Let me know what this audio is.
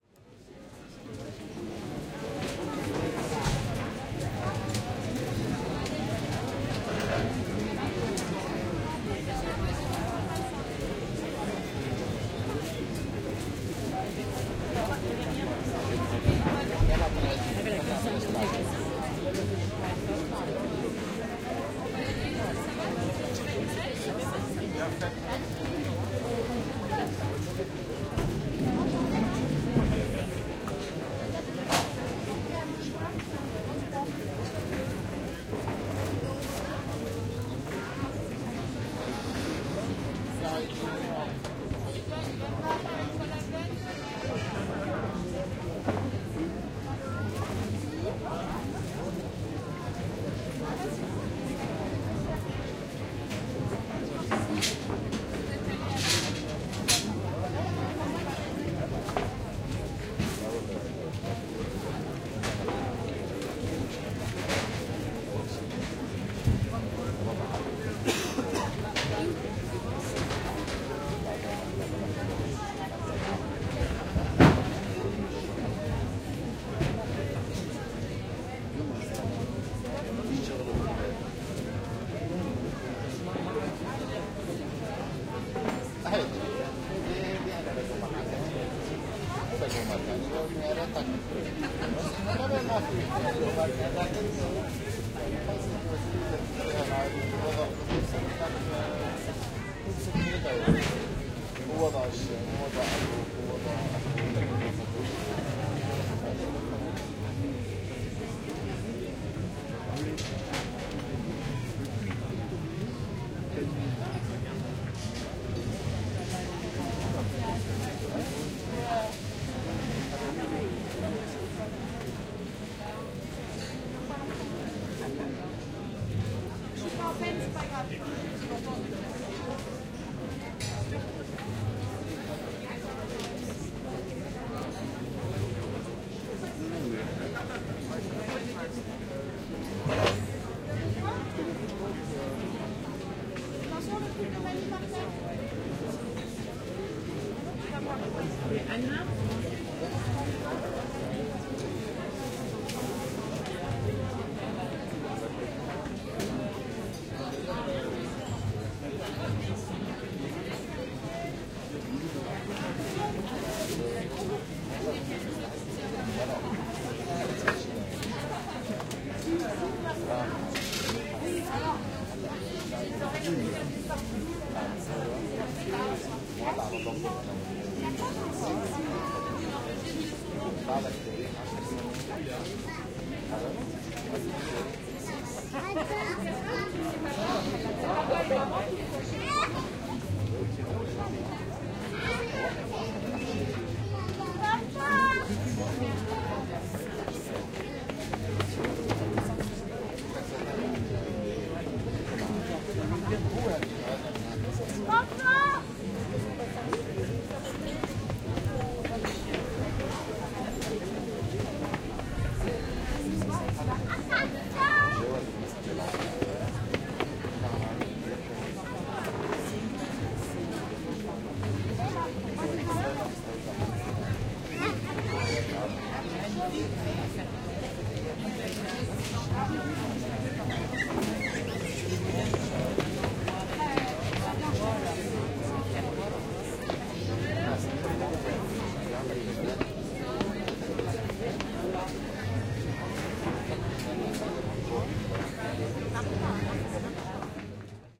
Recording of a village main square where people are having a drink outside. This has been done at St Gildas de Rhuys in Bretagne